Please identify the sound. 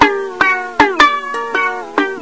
Short twangy melody on 2 string gourd. Recorded at 22khz